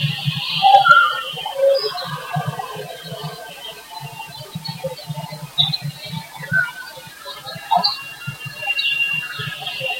Artifacts in recording could be a problem, but what will be if you record only them?
...crazy idea? Probably. There, I've mixed eight records of „nothing” from my microphone.
artifacts, digital, weird, crazy, microphone, strange